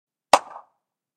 A handclap with short echo, made in the mountains, processed with a noise-limiter